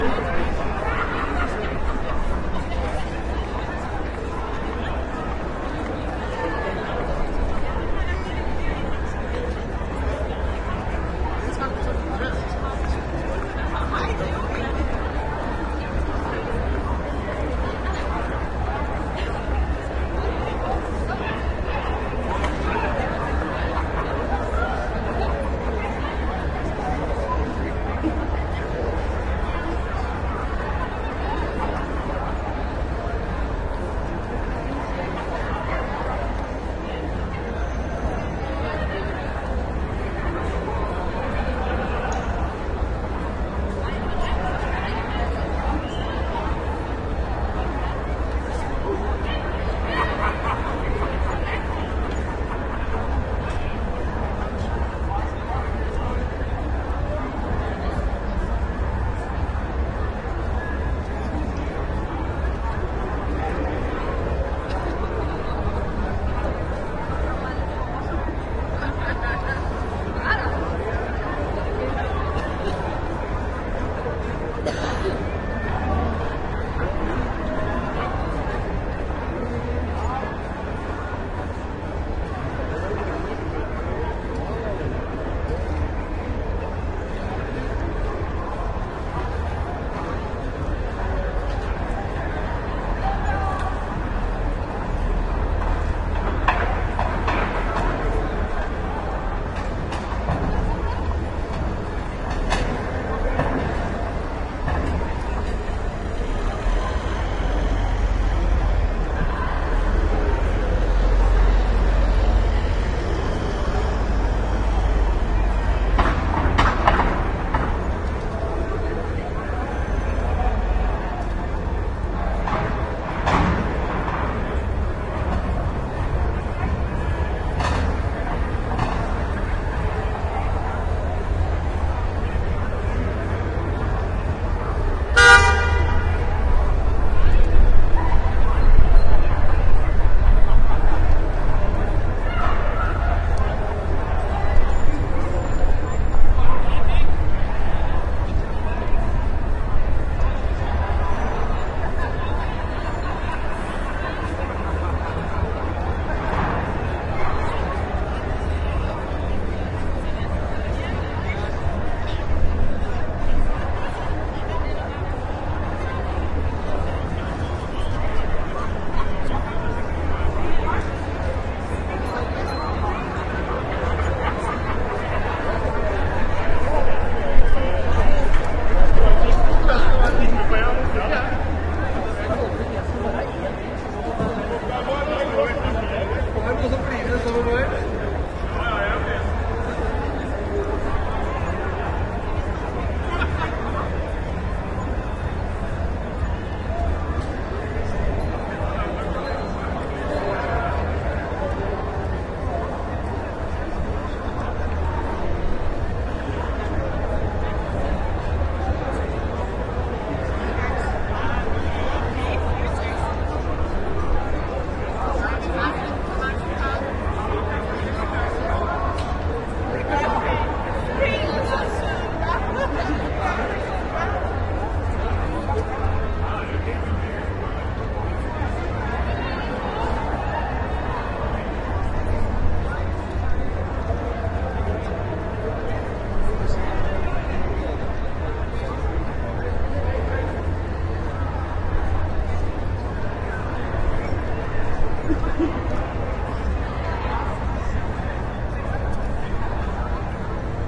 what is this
Nightlife in Aarhus
Nightlife in the citycenter of Aarhus / Denmark on a busy Friday night. Partytime! Shure WL183 microphones, FEL preamp and R-09HR recorder. Recorded on the night to the 1st of May 2010. Skol!
nightlife, crowd, danish, aarhus, denmark, city, field-recording, people, party